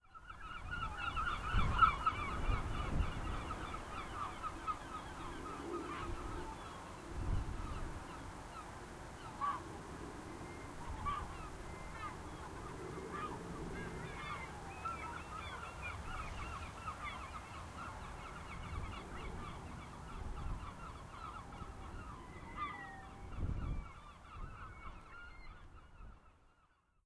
Gulls at Moelfre
The sound of Herring gulls off Ynys Moelfre Anglesey
Coast, Seashore, Birdsong